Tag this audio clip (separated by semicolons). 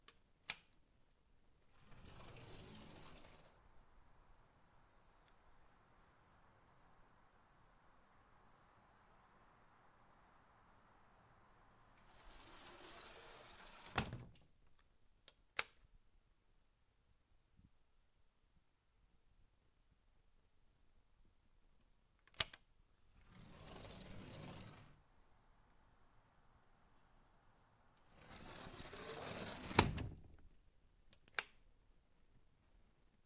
close; door; glass; lock; night; open; outside; unluck